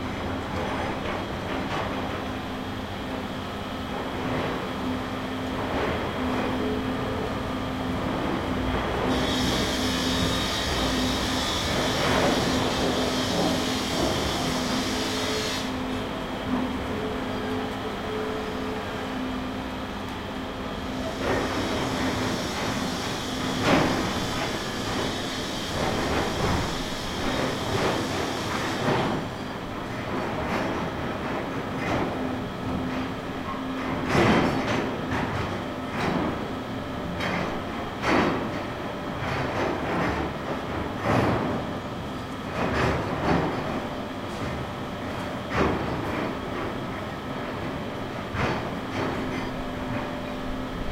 construction backhoe heavy digger echo1
backhoe, digger, heavy